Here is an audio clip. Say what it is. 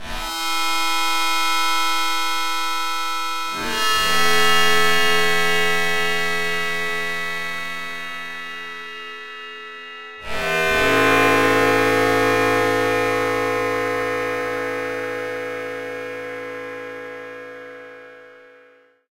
This pack comprises a series of sounds I programmed in the Aalto software synthesizer designed by Randy Jones of Madrona Labs. All the sounds are from the same patch but each have varying degrees of processing and time-stretching. The Slow Aalto sound (with no numeric suffix) is the closest to the unprocessed patch, which very roughly emulated a prepared piano.